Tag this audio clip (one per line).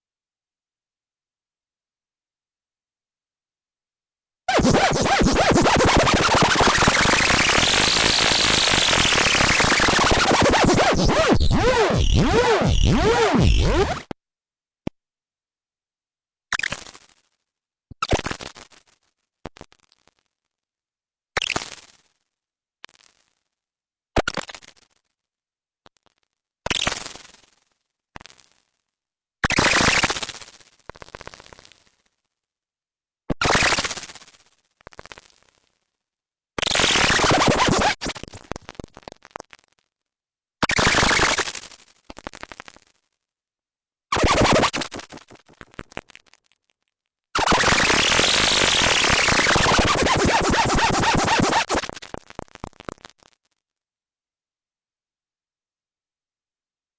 bee
synth
synthesizer